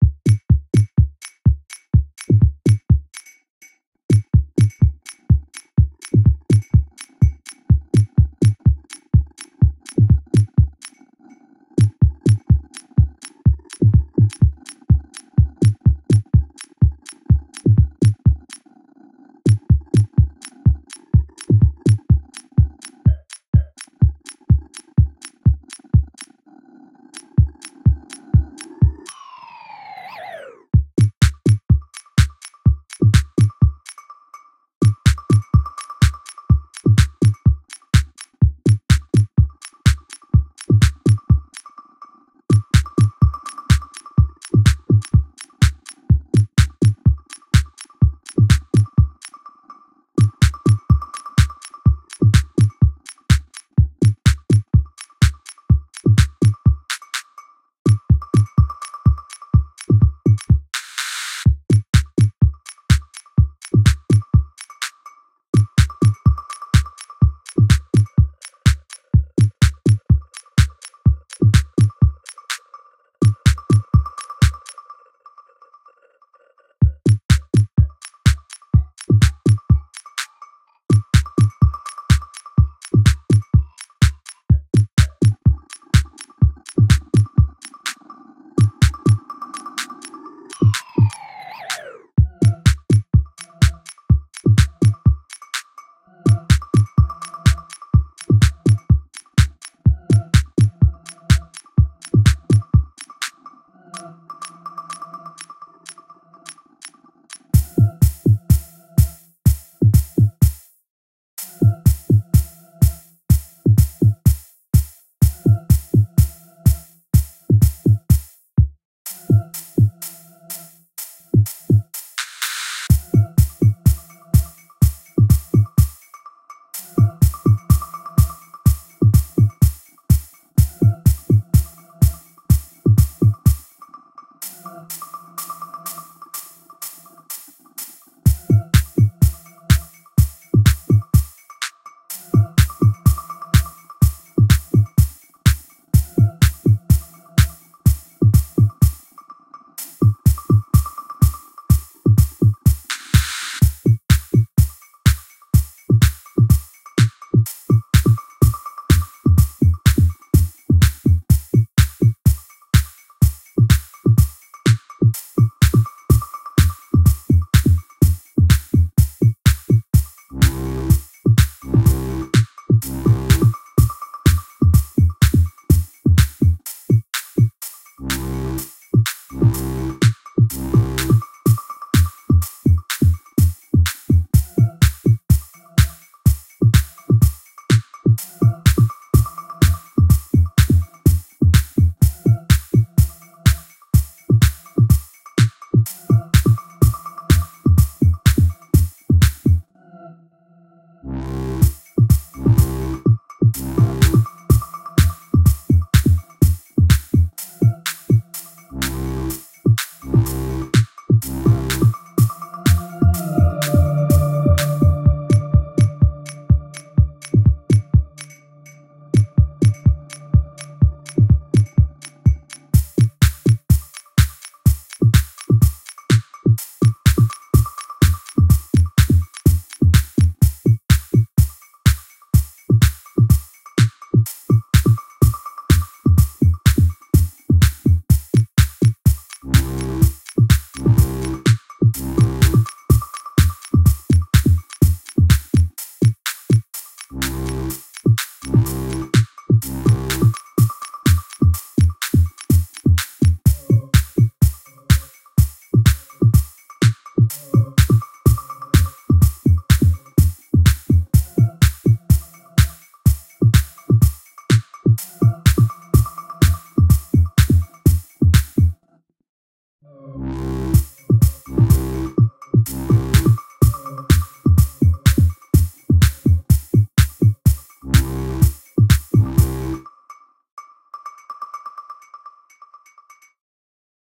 Simple "minimal" techno song. Drums, effects and a minimal amount synth. no real bassline.